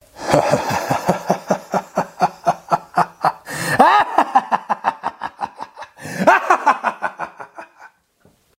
Evil Laugh 3
Evil, Crazy Laughing from Deep voiced Man
Guy, Laughter, Evil, Mad, Villain, Deep, Free, Villainous, Bad, Laugh, Man, Crazy